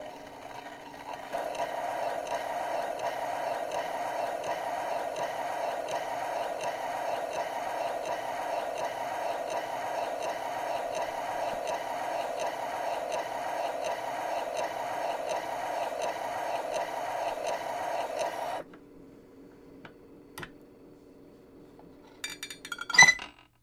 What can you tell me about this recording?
This is the end of a 78rpm record on a 1920's or 1930's era gramophone. The music has just ended and the needle moves into the lead out groove, where it stays for a bit before being picked up and the machine turned off.
record, rpm, 78, gramophone, phonograph, start